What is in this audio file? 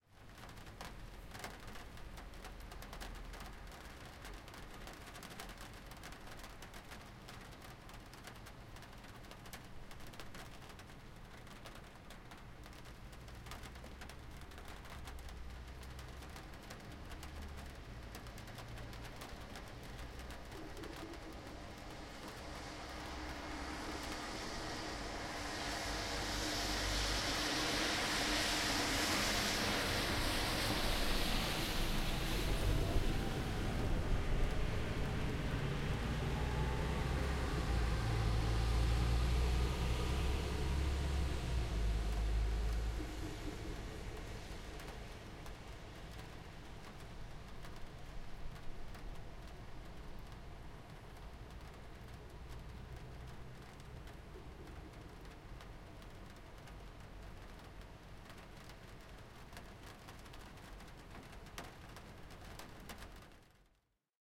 Rain on windowsill and traffic
A recording of the rain falling on my windowsill. No thunder in this one.
atmosphere, field-recording, Rain, rainfall, raining, shower, storm, traffic, weather